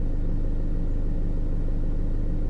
Motor Loop
engine of a diesel van recorded with a ZOOM H2, suitable as a loop
bus, car, engine, loop, motor, running, van